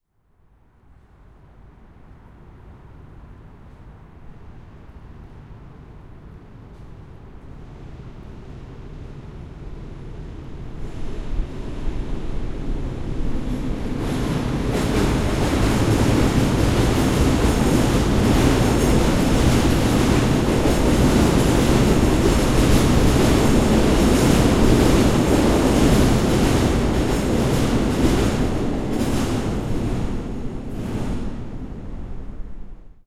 0309 Train over bridge from below 3
Train, metro passing over the bridge, recording from below the bridge.
20120616
field-recording, korea, metro, seoul, train